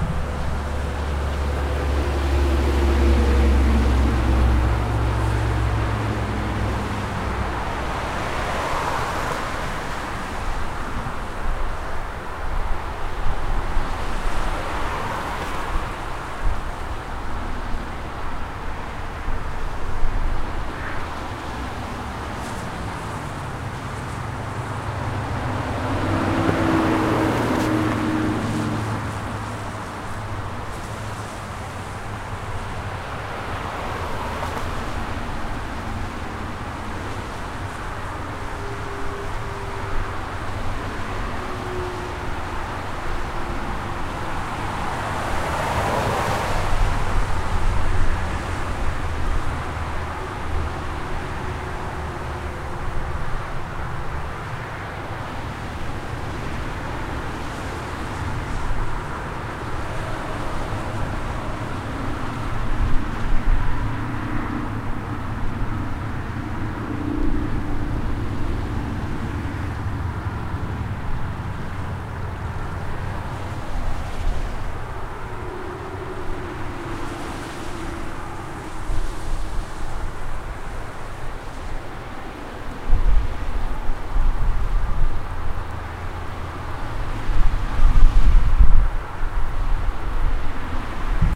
Traffic on I95 recorded from about 10 feet from the exit ramp recorded with HP laptop and Samson USB mic.
automotive, field-recording, interstate, traffic